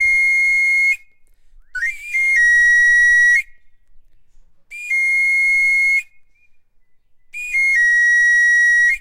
Knife sharpener 2
"El afilador"
In Mexico this sound is characteristic from the knife sharpeners working on the street.